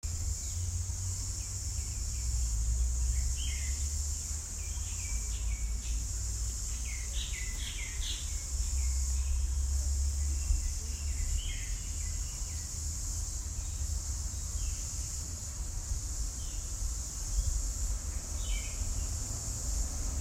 Nature sounds in the Sir Seewoosagur Ramgoolam Botanical Garden aka Le Jardin de Pamplemousse.
Garden, Botanical, Nature